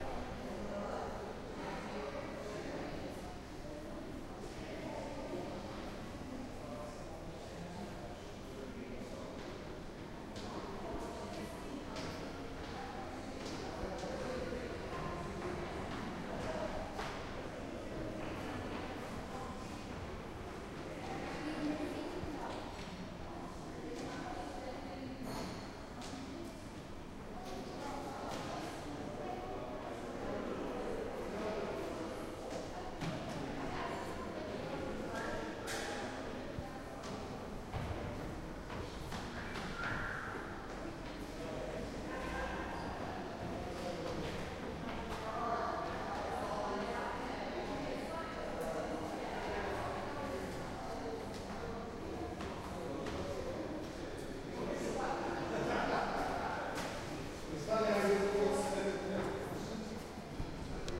Museum Ambience
Art Gallery ambience recorded at the Lightbox in Woking, UK [Tascam IM2]
Ambience, Atmosphere, Field-Recording, Gallery, Museum, Public, Space, Walla